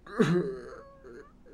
Zombie hurting voice
hurt, noise, voice, zombie